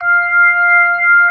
real organ slow rotary
b3, tonewheel, organ